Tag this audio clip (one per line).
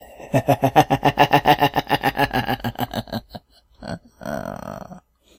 crazy
demented
mad
insane
laughter
psychotic
evil
male
laugh